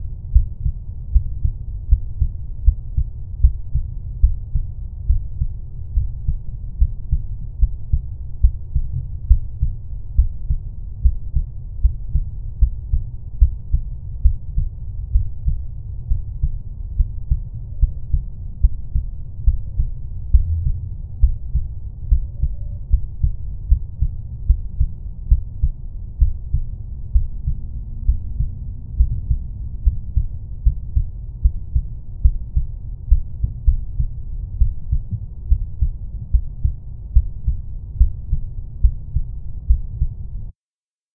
Sonido grabado con micrófono shure PGA 48 con phantom power y una interfaz Behringer Uphoria UMC202HD, micrófono colocado directamente sobre el pecho y aislado con telas para intentar minimizar el ruido ambiental.
Editado en Ardour donde se le aplico un filtro pasa bajas para eliminar el ruido mismo del micrófono y un ecualizador para fortalecer las frecuencias significativas del audio.
cardiaco, corazon, Heart, heartbeat